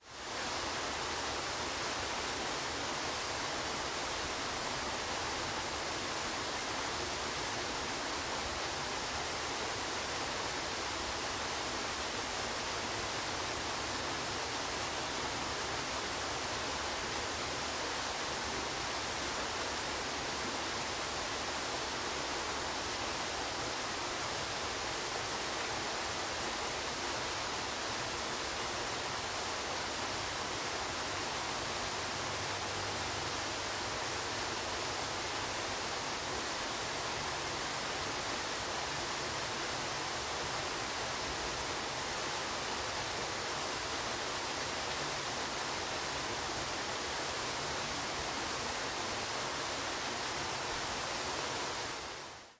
Big waterfall from stone bridge
Waterfall in a forest recorded from a old stone bridge.
Recorded in Ticino (Tessin), Switzerland.
ambience, ambient, field-recording, flow, forest, nature, peaceful, relaxing, stream, switzerland, tessin, water, waterfall, woods